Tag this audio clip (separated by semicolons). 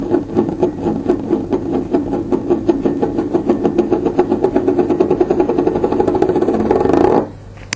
mug; spinning; tea